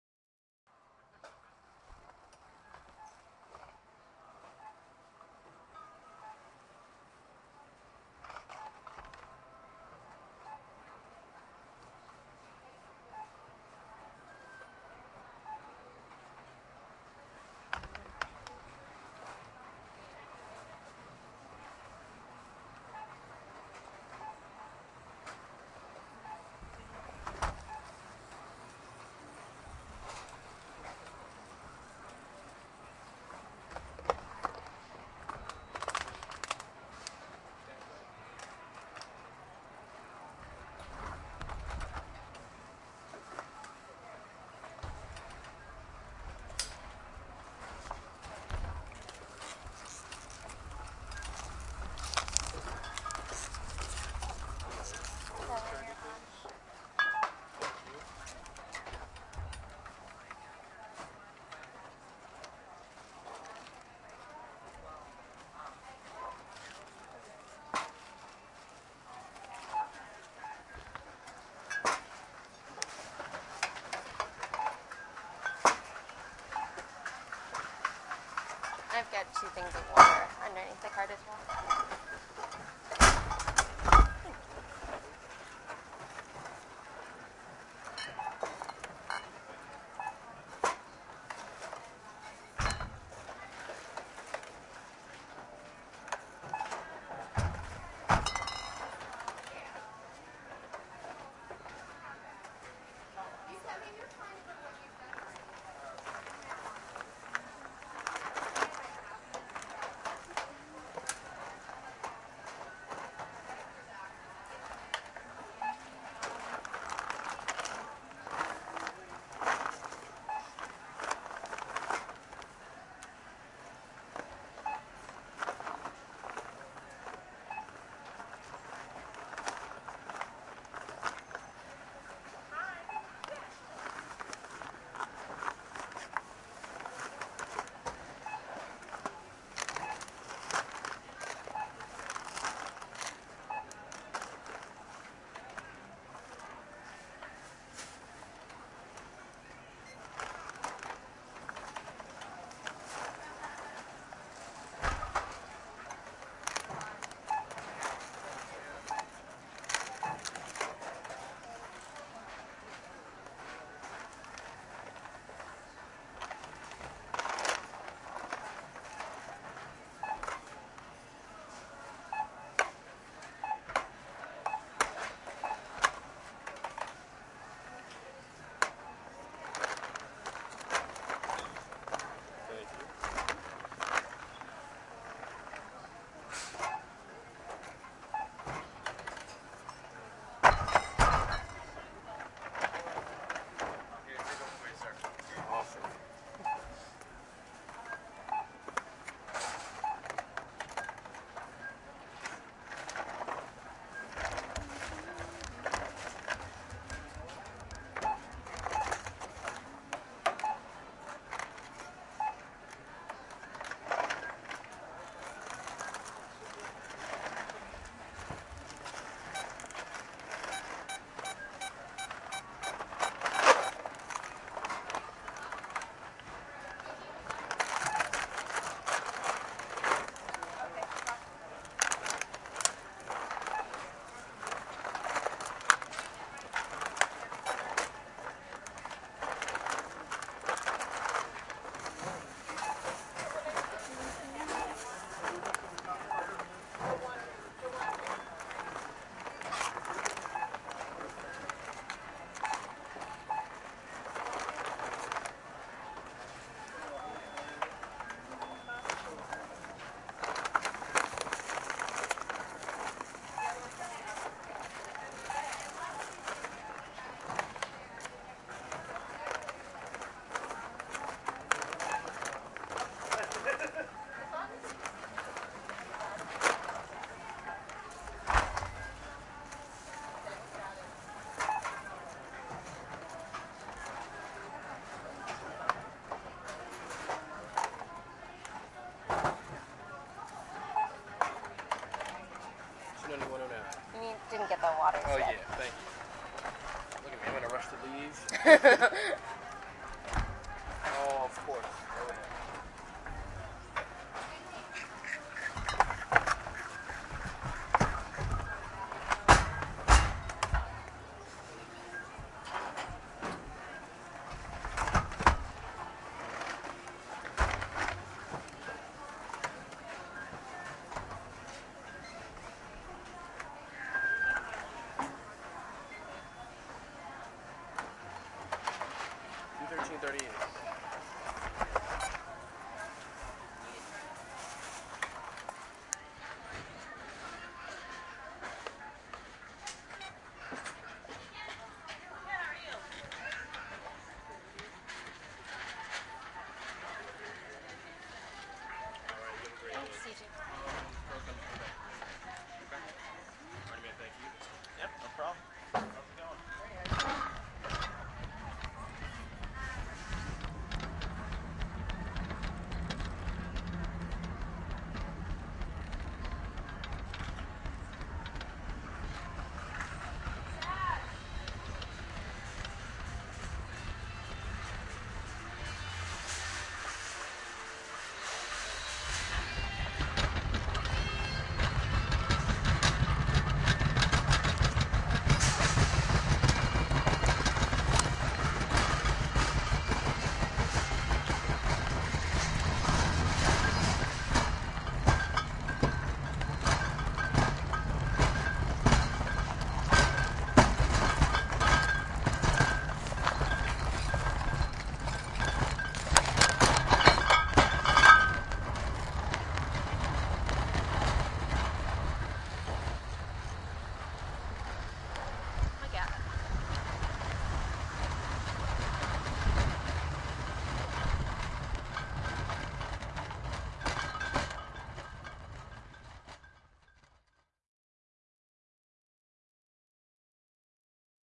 Wegmans2 checkout Fredericksburg Jan2012
This is a field recording of the checkout line at Wegmans. There's lots of great beep rhythms, the groceries rustling as they are put into bags and some conversation near the end. The sounds from pushing the cart outside are pretty suberb. Recorded with my ZoomH2